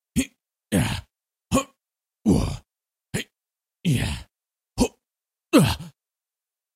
Man Jumping Noises
A man who likes to jump from one cliff to another. Sometimes he falls.
man, voice, rpg, jumping, exhausted, human, male